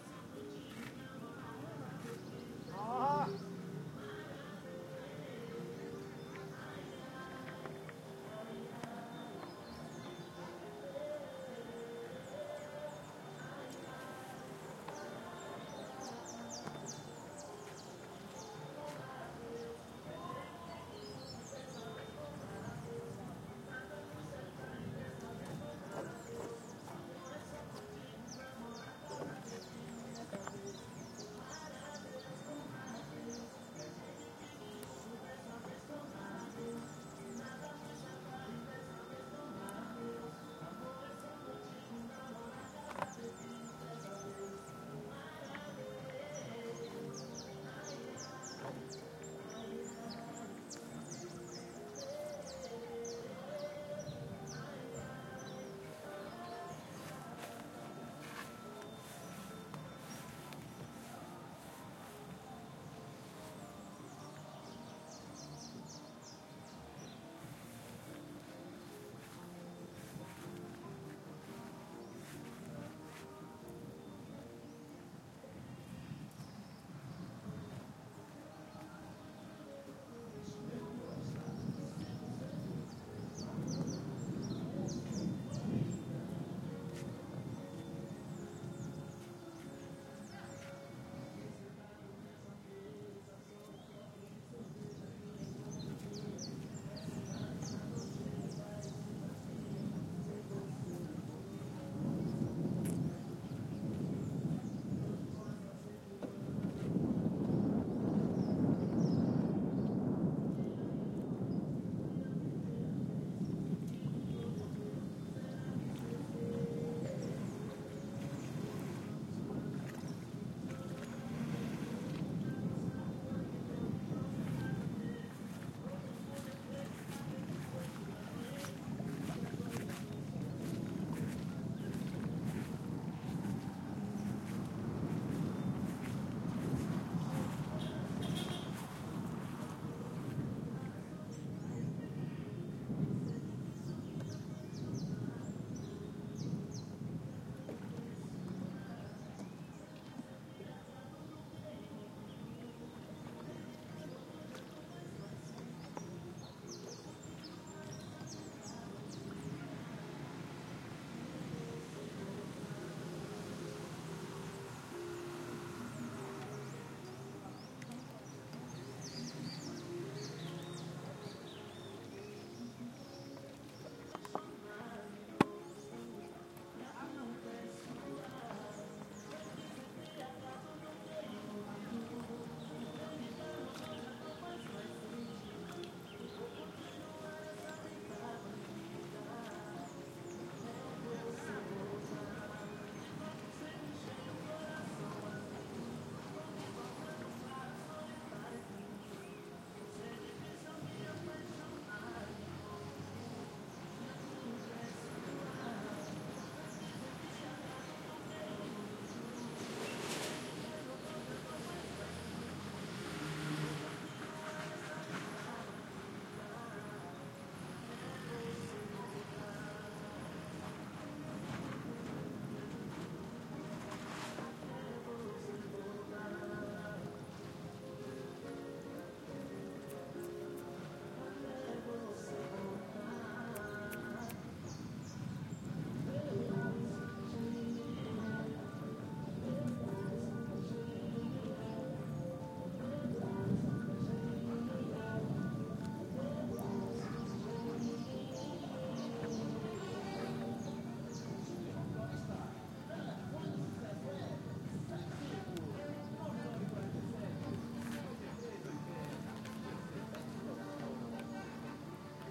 Sexta-feira. Homem com seu barco sai pra pescar. Os quiosques começam a abrir para o comércio ao som do arrocha de Silvanno Sales.
barco, maré, orla, faceira, arrocha, vento, pescador.
Gravado por Filipe de Oliveira
Equipamento: gravador Sony PCM
Data: 20/março/2015
Hora: 10h20
Friday. A man with his boat out to fish. Kiosks begin to open. We heard the sound of Silvanno Sales' arrocha
Recorded by Filipe de Oliveira
Equipment: Sony PCM recorder
Date: March/10/2015
Time: 10:20 a.m.